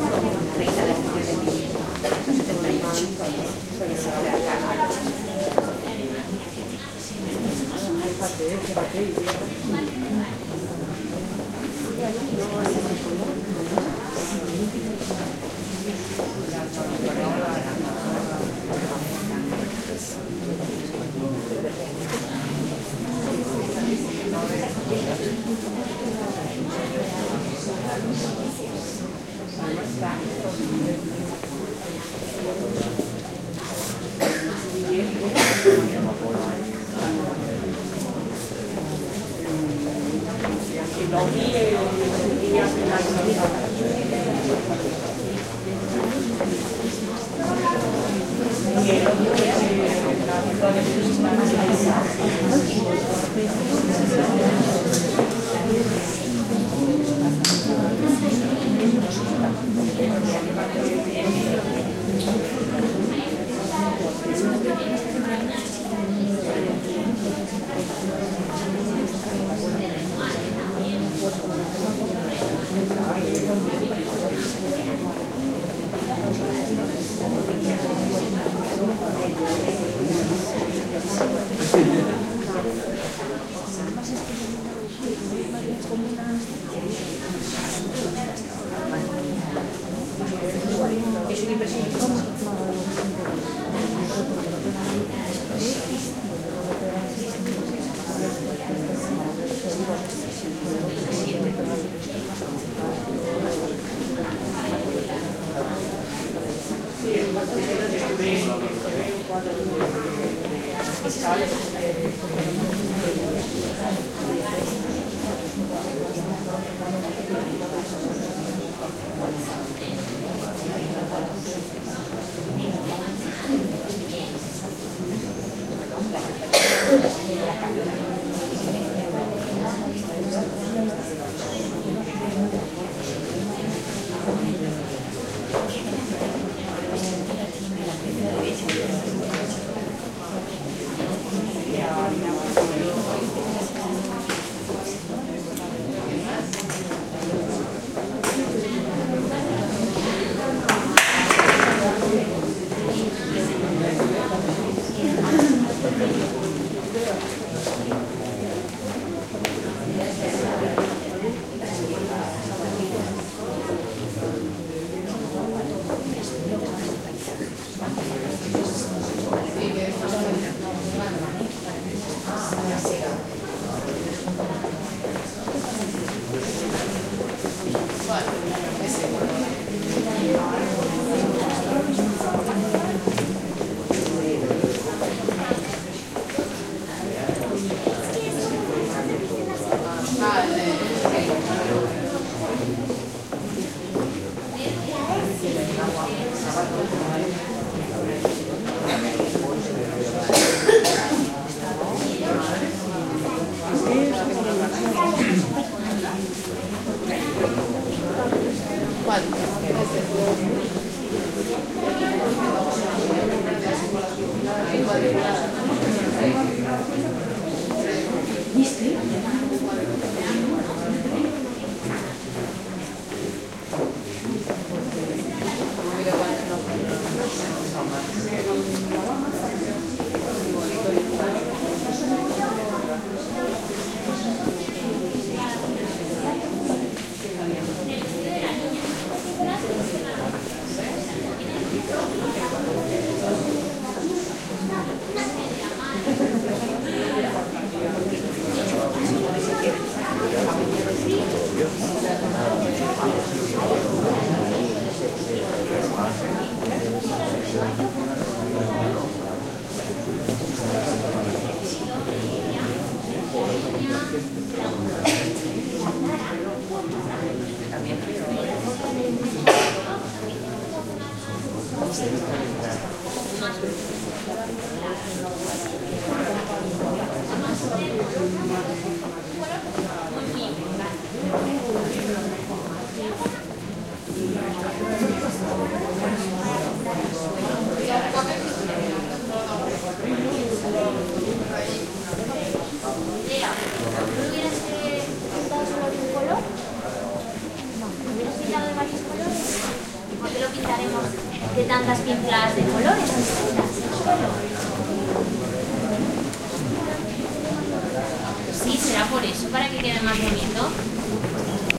murmurs of people at a painting exhibition. Recorded inside the Thyssen Musem, Madrid. Olympus LS10 internal mics
voice, ambiance, museum, field-recording